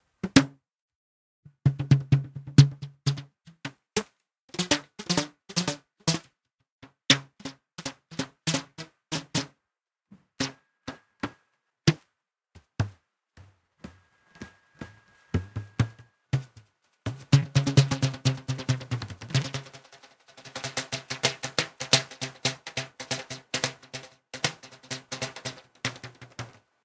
elastic foley 1 irakaz
Me plucking elastic
foley, elastic, pluck